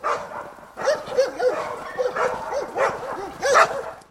Dogs barking 2
angry, animal, bark, barking, dark, dog, dogs, growl, growling, hound, labrador, mongrel, night, pet, pitbull, rottweiler, terrier